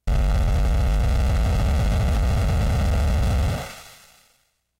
minibrute low noise01
Sound made with the Arturia Minibrute.
synth, synthesizer, analog, minibrute, synthetic